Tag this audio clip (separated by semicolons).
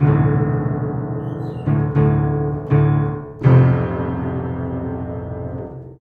doom; low-register; piano; play-hard